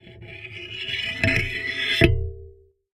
Tweezers recorded with a contact microphone.